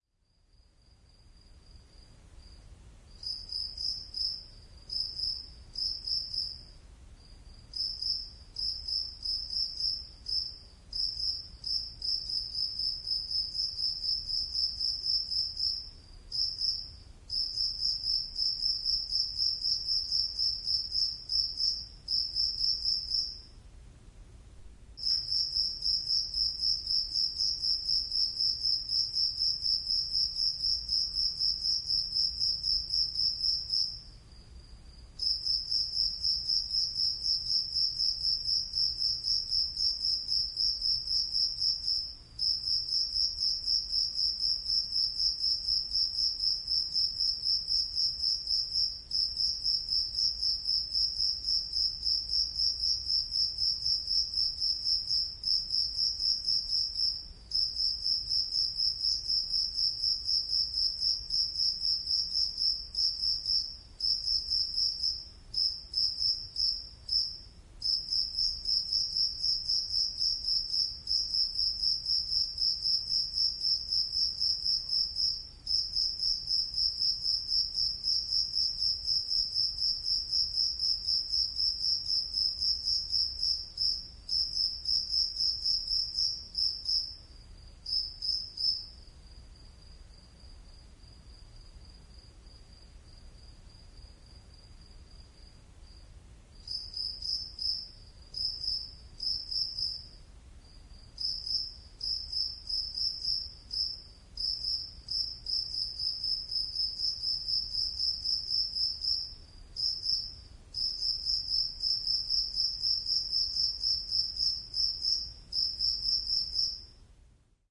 A very annoying cricket in the garage at 3:00am 7/1/2006. Marantz PMD 671 Recorder.
insect, nature